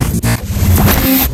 robotic transform 5
Robotic transforming sfx for motion, tranformation scenes in your logo video or movie.
construct, futuristic, granular, sound, transformation, transformer